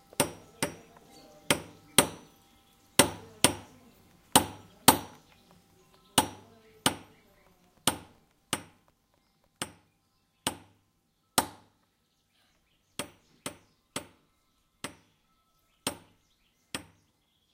door knocker (Spanish 'aldaba') at Villa Maria. Wind chimes, bird chirps and talk in background. Shure WL183 capsules into Fel preamp and Edirol R09. Recorded near Carcabuey (S Spain)
ambiance, andalusia, countryside, field-recording, house, knocking